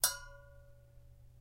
Bb f-nail 6.L
recordings of variouts trumpet extended techniques, performed by David Bithell, recorded by Ali Momeni with a Neumann mics (marked .L) and an earthwords (marked .R). Dynamics are indicated with from pp (very soft) to ff (very loud). V indecas valve, s and l indicate short and long, pitches in names indicate fingered pitches,
davood, bell, metalic, trumpet, extended, technique